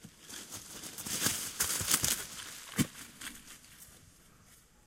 Jumping from a Hill.